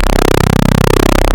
synthe lead done with a damaged Korg Polysix. Some Oscilators had an error and did wired modulations.
korg, synthe, polysix, damaged, noise